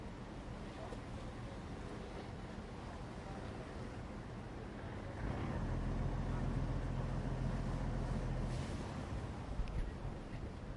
Bangkok Saphan Taksin Boat 1
engine, field-recording, river